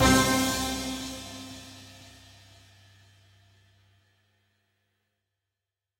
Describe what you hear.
Orch Hit
A nice big orchestra hit.
hit, orchestra